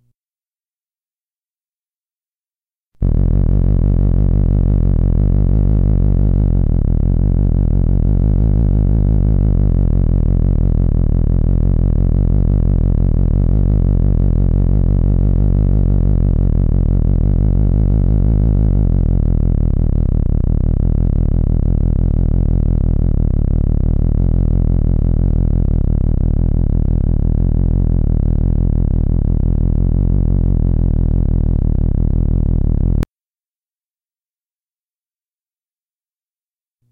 This is a 30-second long steady oscillation using the lowest end of the theremin. Tonal and wave form settings were set for a "creamy" feel. Great for creating a rumbling sound bed. slice it, dice it, do what you will - 1001 uses!
Every effort has been made to eliminate/reduce hum and distortion (unless intentionally noted).